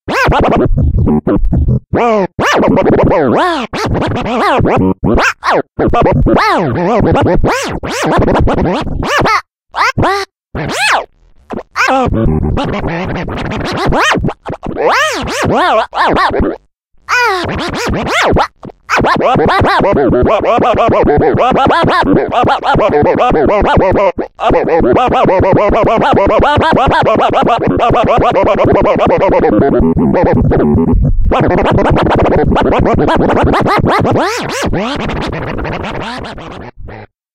These samples made with AnalogX Scratch freeware.